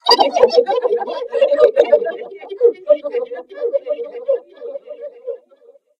After recording the same person laughing in different tones, I've normalized the tracks and I've started adding effects.1) I've changed the tempo.2)I've change the pitch in order to have high-pitched voices.3)I've changed the speed.4) I've mixed the tracks.
evil-laughs, high-pitched, laughs